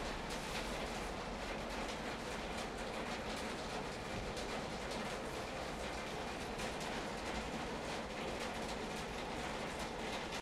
Gentle rain on metal roof
The sound of gentle rain on a corrugated metal roof in a reverberant room.
Similar: Rain on a metal roof, from a distance.
metal, indoors, roof, water, rain, weather, ceiling